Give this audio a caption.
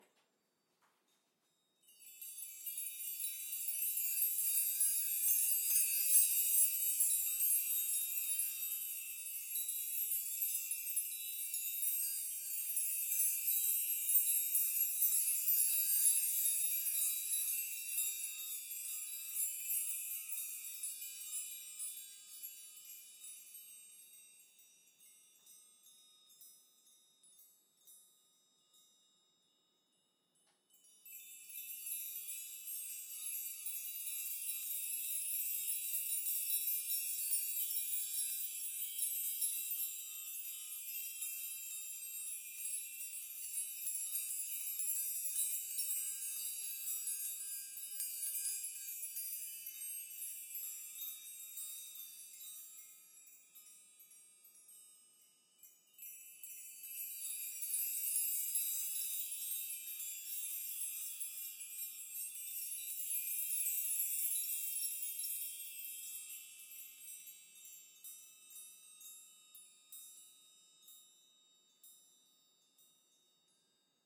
Key Chimes 04 Medium-Shake
Close-mic of a chime bar made from various size house keys, medium shaking the frame. This was recorded with high quality gear.
Schoeps CMC6/Mk4 > Langevin Dual Vocal Combo > Digi 003
ethereal, tinkle